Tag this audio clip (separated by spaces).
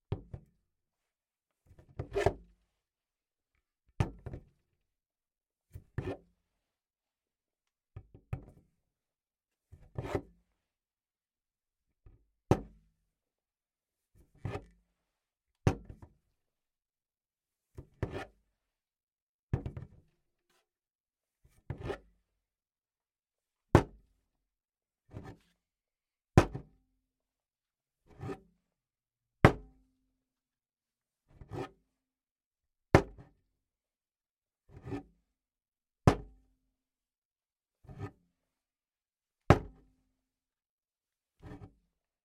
bottle drinking Foley glass handling-noise movement onesoundperday2018 preparation